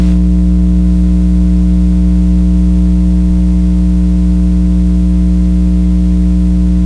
my mixer hum
I knew this mixer made some kind of noise! recorded with old phone pickup microphone.